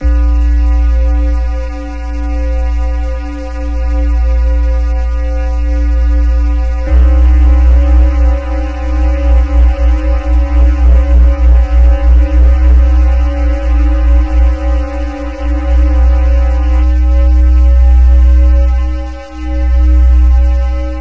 My alarm sound
alarm, enemy, war, warning